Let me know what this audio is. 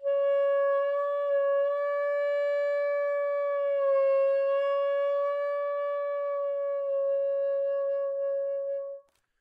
A long tone (approx. C#) on alto sax, with pitch divergences.